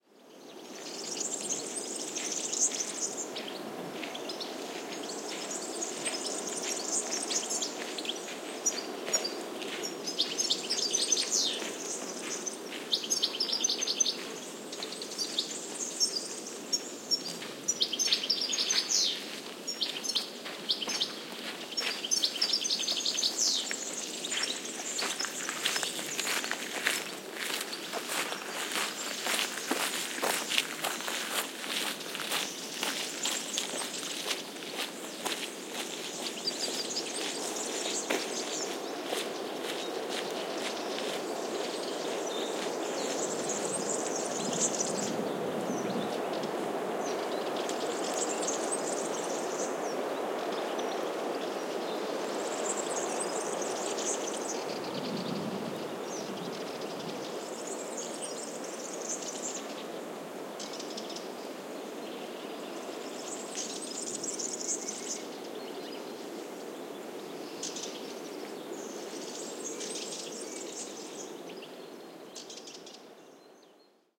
20180313.walk.through.forest
Noise of footsteps on coarse sand, forest ambiance in background including birds calling and wind on trees. Audiotechnica BP4025 into SD MixPre-3
ambiance, birds, field-recording, footsteps, forest, nature, south-spain